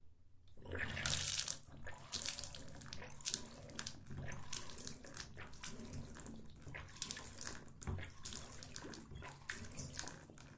Water splurging out of a tap